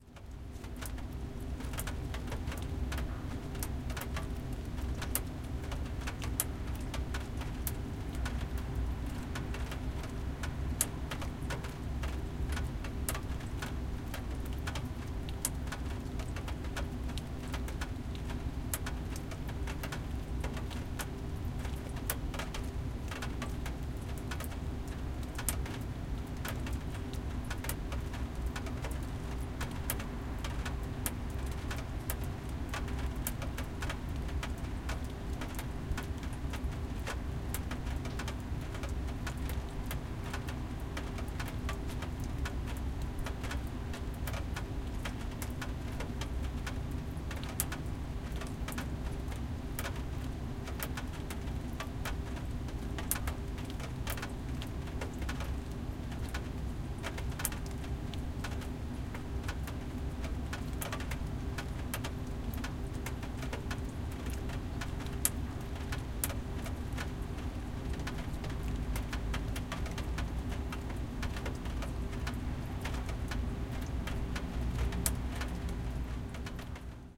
factory and drops 231211
23.12.11: about 5 p.m. Sound of drops dropping from fence on metal cornice. In the background noise made by factory. Domeyki street in Sobieszow (south-west Poland). recorder: zoom h4n. fadein/out only